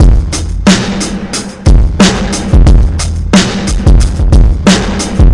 Zajo Loop22 02 commander-rwrk
a few experiments processing one of the beautiful hip-hop beat uploaded by Zajo (see remix link above)
overcompressed and heavy distorted beat